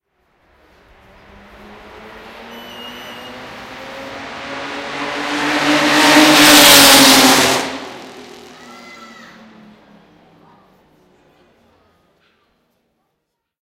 zolder mercedes W125 3
Pass by of the Mercedes W125 car on the Belgian Zolder Circuit during the Historic Grand Prix
1937, belgium, engine, mercedes, race, vintage, w125